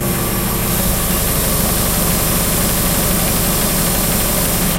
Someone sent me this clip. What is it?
JCB Bucket Rotating 3
Rev, Machinery, Factory, Buzz, low, machine